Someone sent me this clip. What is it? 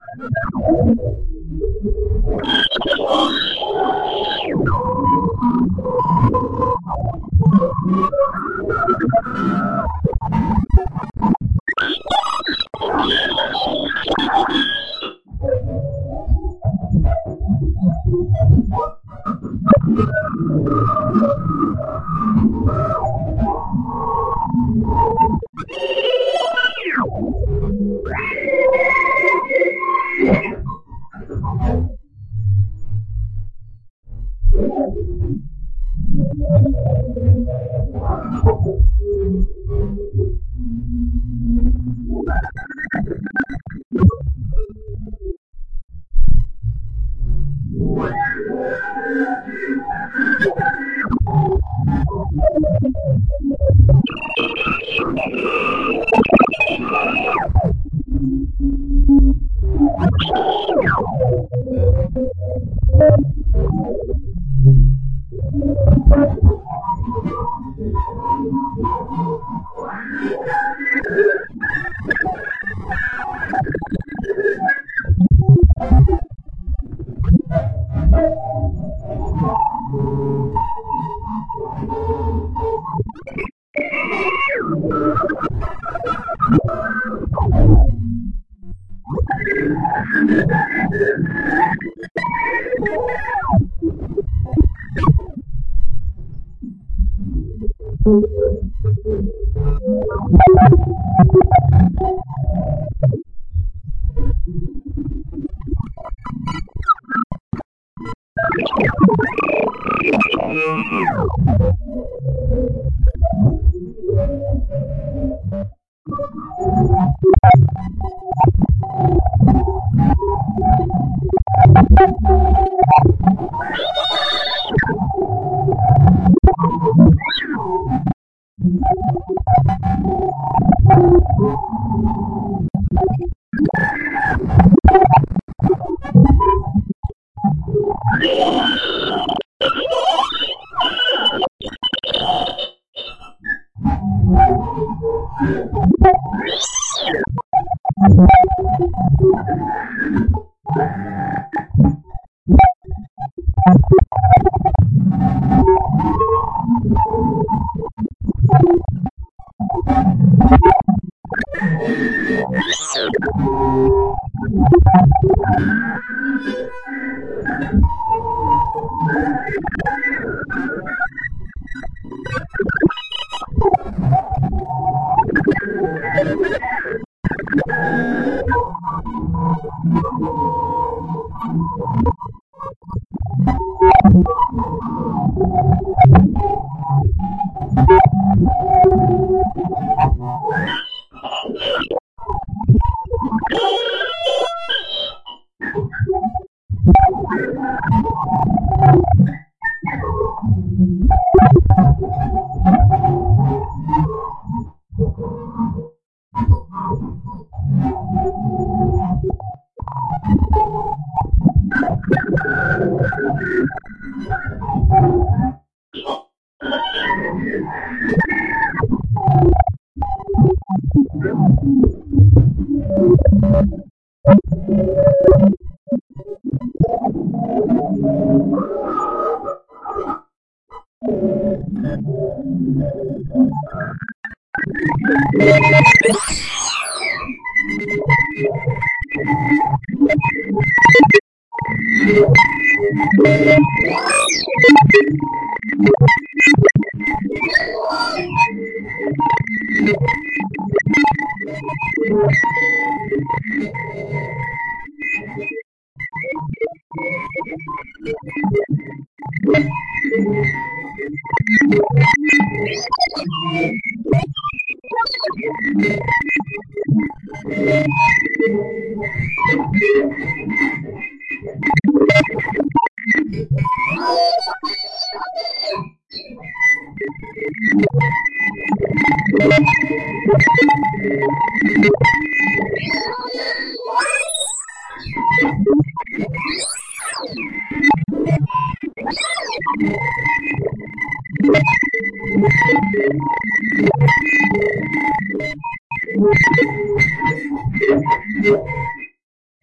VCV Rack patch
digital, electronic, experimental, glitch, granular, loop, modular, noise, processed, synth, synthesizer